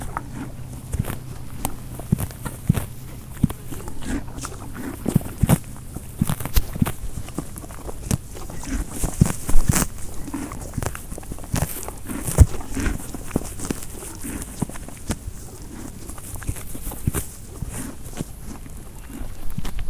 Recording of a horse grazing a grass in a field